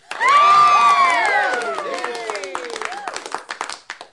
Small audience cheering with delight
applaud, applauding, applause, audience, cheer, cheering, clap, clapping, claps, crowd, group, hand-clapping, studio, theater, theatre